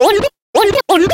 Scratching vocal phrase. Sounds like "oun-ouni-uni"
Recorded in cAve studio, Plzen, 2007
you can support me by sending me some money:
phrase, turntablism, record, vocal